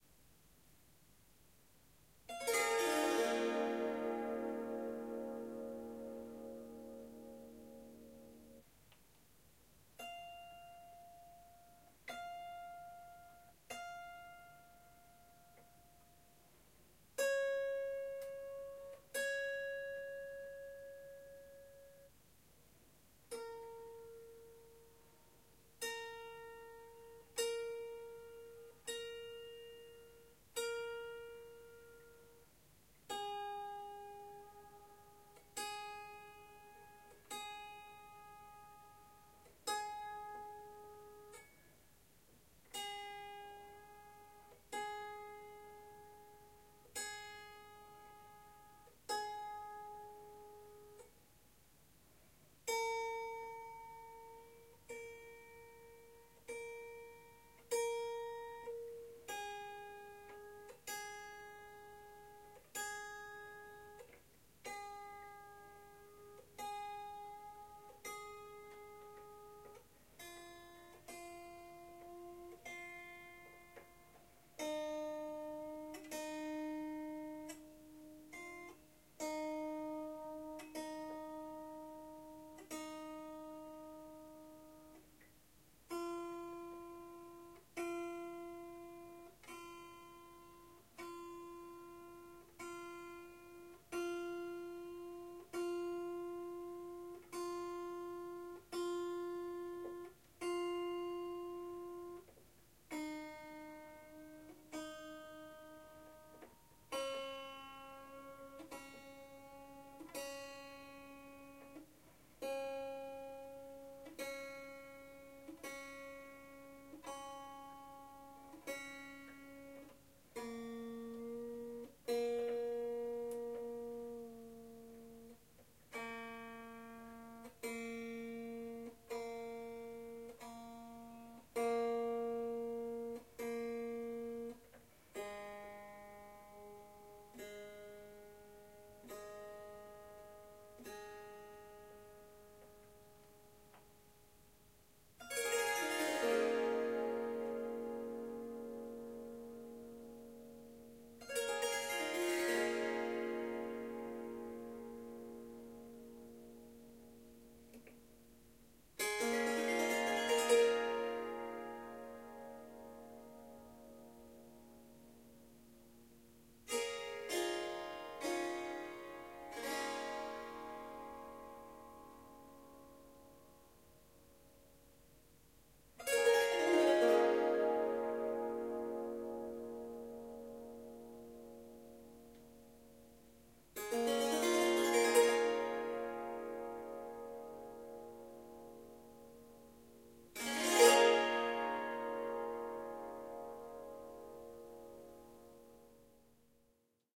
Tuning Swarmandal Indian Harp
Tuning the Swarmandal (Indian Harp) One out of tune strum then (a rather painful) tuning of the Swarmandal's 15 strings. After tuning some much nicer in-tune strums and tinkles.
Tuning is to C sharp with the fourth note (F sharp) removed from the scale. Top note is F going down to a G sharp.